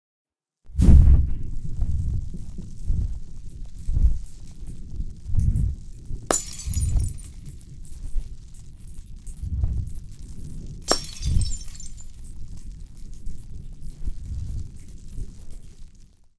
Burning(improved)
burning, glass, car, fire